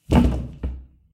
A body hits a wooden door. It hurts. Natural indoors reverberation.